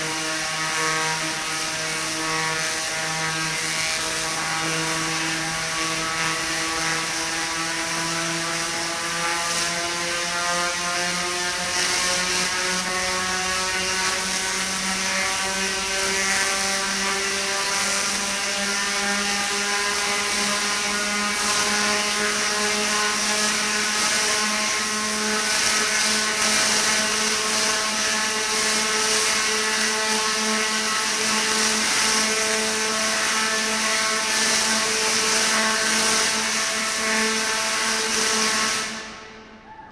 Tesla ascending-m
Large Tesla coil fired off in a very large old factory. Probably around 40KW.
It is unusual to hear a Tesla coil of this size in an indoor space.